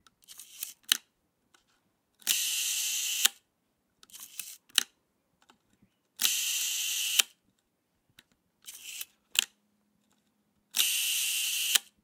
Old film camera shutter
The shutter of a really big old Technika film camera. The shutter speed was set to 1 second.
OWI, camera-shutter, film, film-camera, long-exposure, old-camera, sfx, shutter, sound-effect, technika